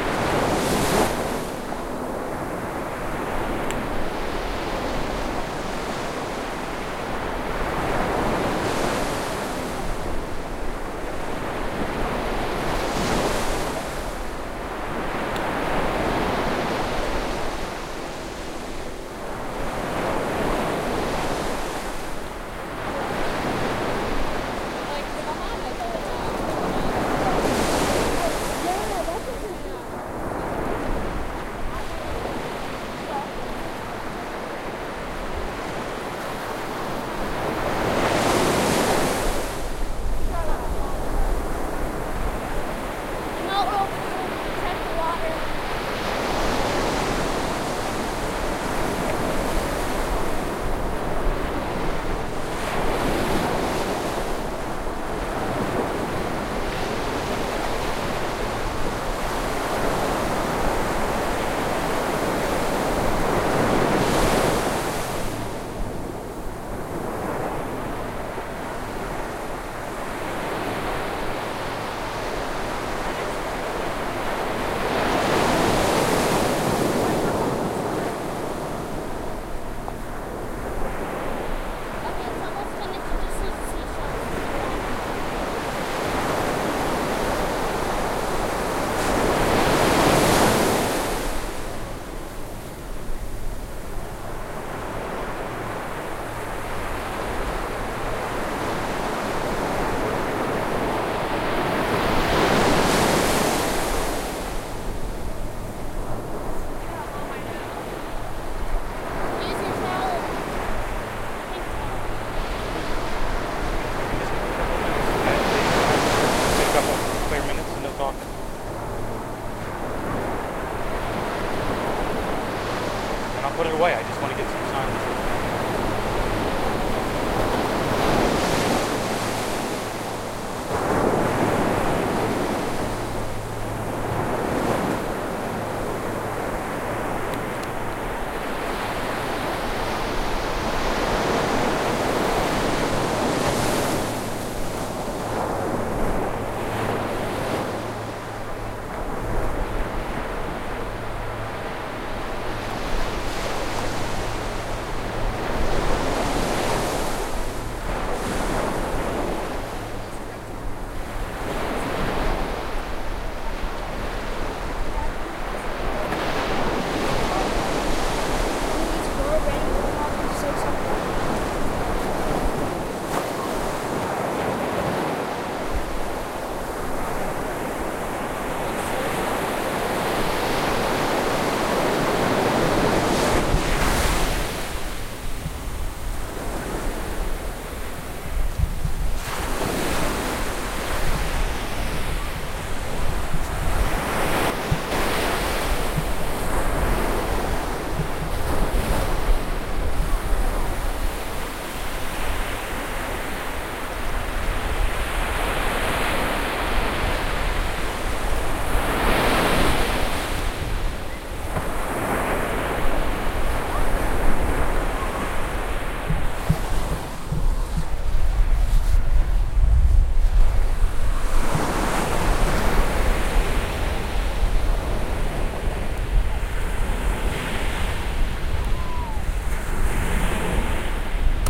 I made a few attempts to simulate a stereo beach scene. On this one I think I did a slight stereo delay that didn't seem to do much.
ambient, beach, field-recording, ocean, summer, surf, waves